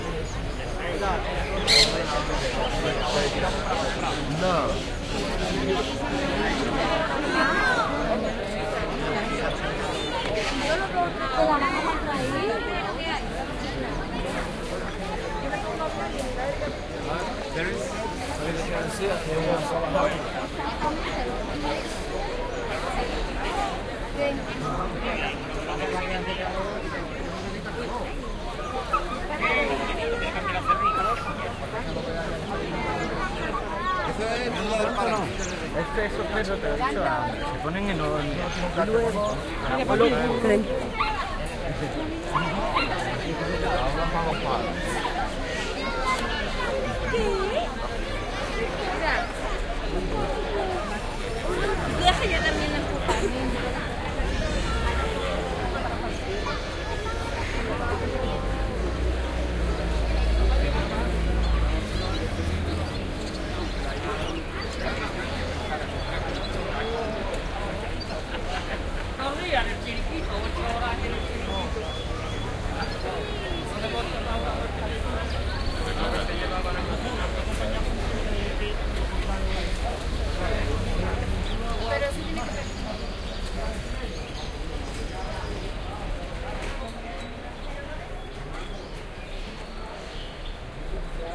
alfalfa.binaural
binaural recording of ambient in a pet street market (at hour of
maximum people density). Voices of adults, children, puppies and birds.
Recorded with Soundman OKM in-ear stereo mics plugged into iRiver iH120 / grabacion binaural del ambiente en un mercado callejero de animales a la hora en que hay mas gente. Voces de adultos y niños, cachorritos, pájaros.
ambient, sevilla, streetnoise